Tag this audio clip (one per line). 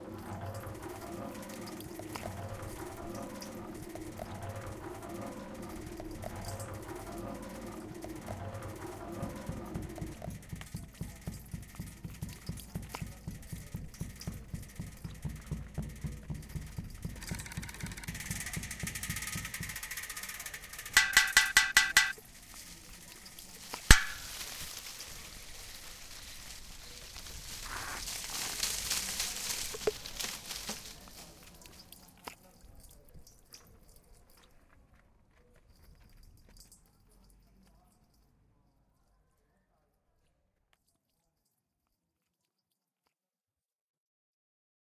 cityrings
wispelberg
ghent